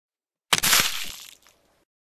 platzender Kopf
pretty clean(a little noise in the end);
exploding head with blood and some brainpieces exiting the head and hitting a wall/floor;
blood, bone, break, breaking, explode, exploding, explosion, head